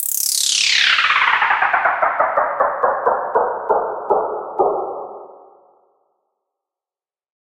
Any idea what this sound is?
Classic time slow down sound effect created in FL Studio using a hi-hat sound effect and a reverb filter.